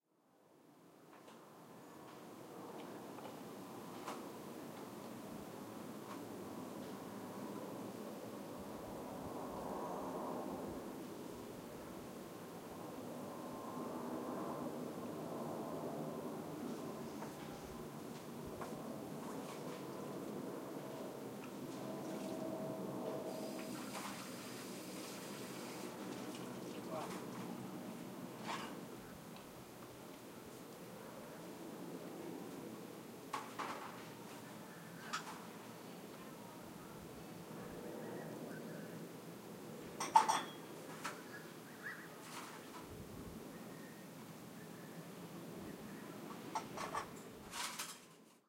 Tai O ppl preparing dinner
Stereo recording of a dog bark in Tai O, Hong Kong. Tai O is a small fishing village. People are preparing table for dinner. The dinner table is set on the wooden bridge that connects all the huts on the river together. Living in the huts in Tai O is almost like living under no roof. One cannot expect any privacy here. Everybody nearby hear exactly what you are doing at any specific moments. There are some birds (Seagulls I reckon) Recorded on an iPod Touch 2nd generation using Retro Recorder with Alesis ProTrack.
dinner, hong-kong, tai-o